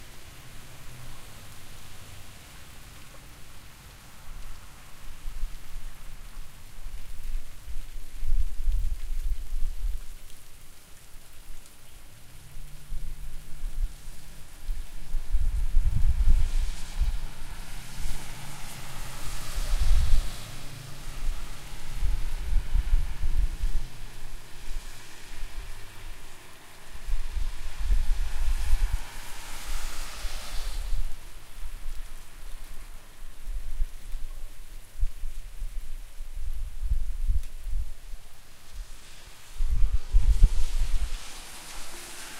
Trees blowing in the wind with occasional nearby traffic.